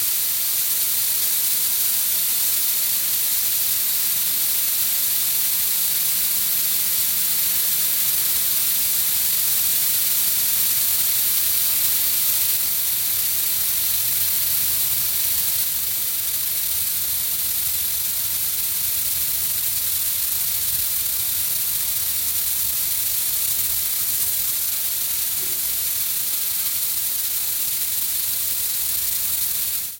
Laser cutting steel plate 6mm thick